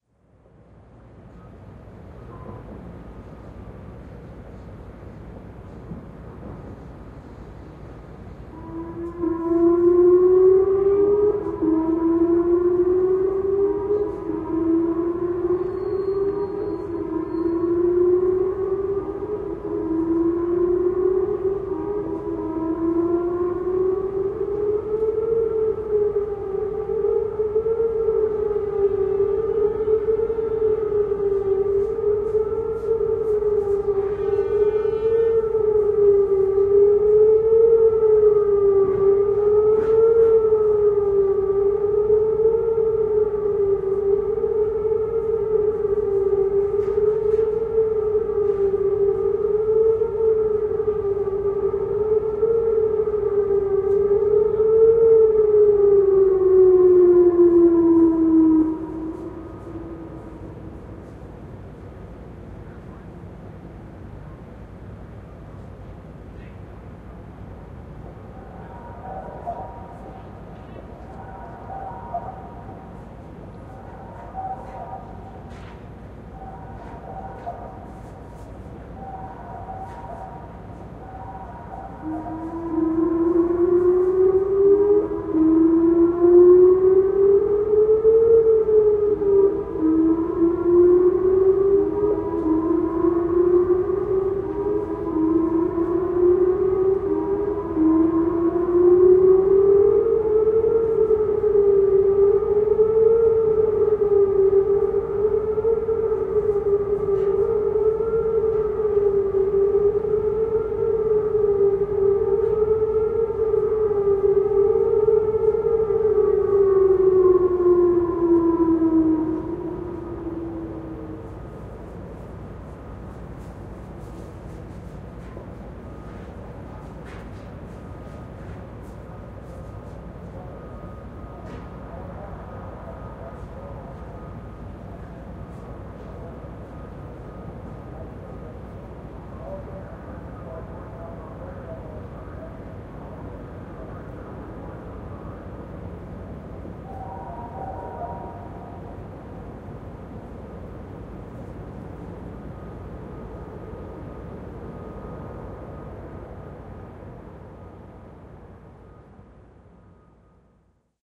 Rocket alarm KAV
Stereo rocket-attack exterior recorded at KAV (Kandahar Air Field, Afghanistan)
I have recorded this sound when I was in Afghanistan to film the Dutch Air Force. I was for 8 days on the compound and experienced 4 times a "rocket attack alarm situation". This recording was the 4th !
rocketalarm, alarm